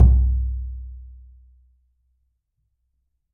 Frame drum oneshot RAW 12
Recording of a simple frame drum I had lying around.
Captured using a Rode NT5 microphone and a Zoom H5 recorder.
Edited in Cubase 6.5
Some of the samples turned out pretty noisy, sorry for that.
deep, drum, drumhit, drum-sample, frame-drum, hit, low, oneshot, perc, percussion, raw, recording, sample, simple, world